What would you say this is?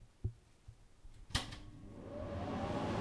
KitchenEquipment CookerFan Mono 16bit
bit, 16